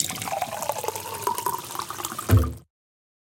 2. filling up water can
filling up a steel water can